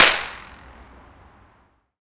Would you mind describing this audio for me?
This is a free recording of a concrete wall outside of masmo subway station :)